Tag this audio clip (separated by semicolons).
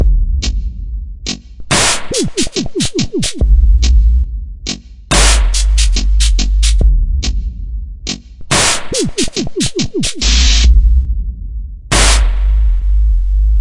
glitch-hop; loop; monome; rhythm; undanceable